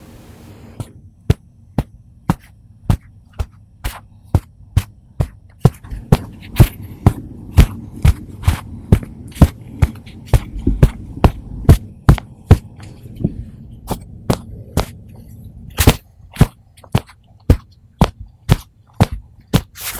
Foot Steps on Carpet
Walked on a carpet. Recorded with my ZOOM H2N.
walk, steps, step, carpet, footsteps, walking, foot, footstep